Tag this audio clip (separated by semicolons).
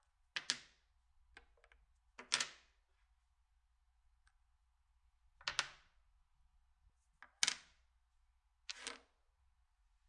domino-on-table; domino; stone